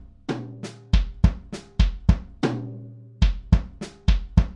Beat27 (105bpm)
percussion-loop, beat, loop, drum, dance, quantized, bass, percs, groovy, rhythm